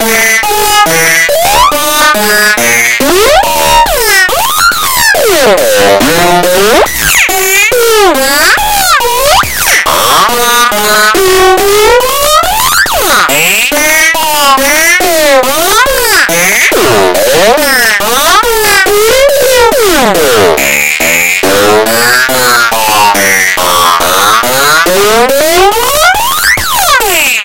Kick 129 - 192. These 64 kicks are created with the help of the granulizer in FL Studio, automation of several parameters and randomized filter cutoff. The result was processed with the FL Blood distortion. Note that these kicks only comes from ONE sample. The automation does the rest. I uploaded them in bundles to minimize the stress for me to write down a good description.